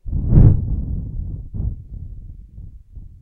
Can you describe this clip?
Quite realistic thunder sounds. I've recorded this by blowing into the microphone.